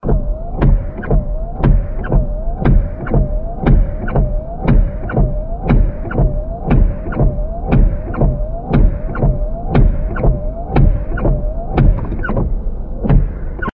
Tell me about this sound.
Windshield Wiper In Car
Windshield wipers wiping car windows. From the inside of a car, quite muffled.
Recorded with Edirol R-1 & Sennheiser e185S.
car, glass, inside, motion, muffled, rubber, sweep, sweeping, sweeps, wiper, wiping